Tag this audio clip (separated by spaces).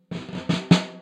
snare roll drum-roll acoustic